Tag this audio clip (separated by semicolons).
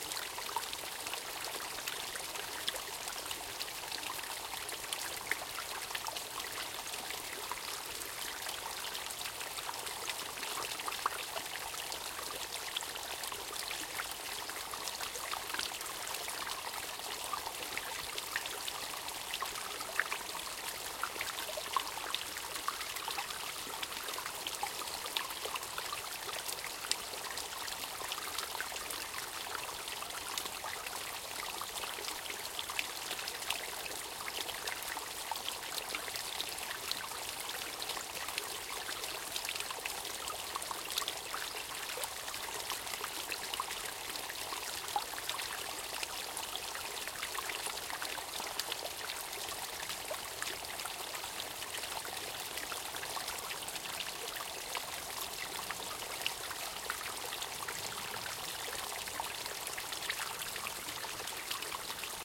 current; dropping; drops; falling; field-recording; fieldrecording; forest; little; mountain; nature; small; switzerland; tessin; ticino; tiny; water; waterfall